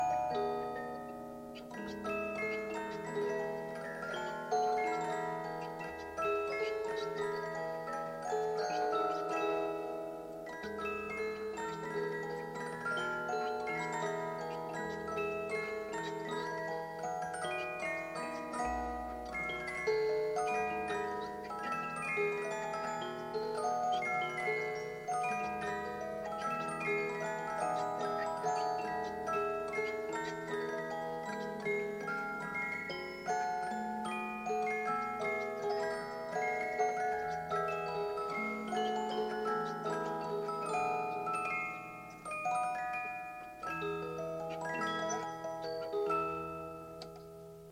Another melody from Vienna.